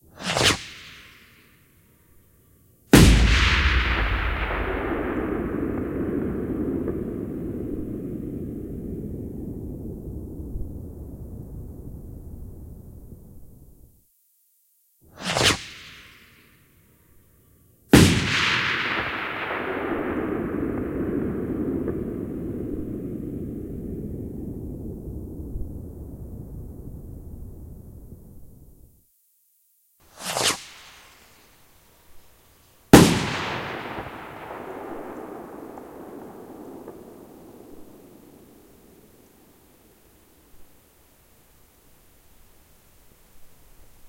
rocket launch
2 areas of processed sounds and the original sound (raw)
bang, bomb, boom, explode, explosion, firecrackers, firework, fireworks, hiss, launch, missle, pyrotechnic, rocket, rockets, war